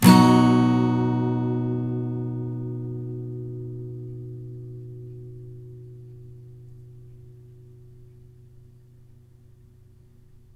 More chords recorded with Behringer B1 mic through UBBO2 in my noisy "dining room". File name indicates pitch and chord.
acoustic, b, guitar, major, multisample, yamaha